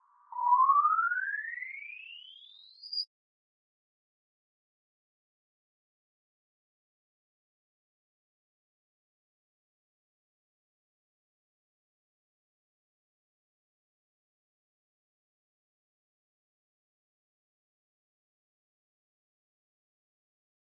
Tarea en proceso
cartoon, falling, process